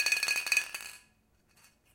prise de son fait au couple ORTF de bombe de peinture, bille qui tourne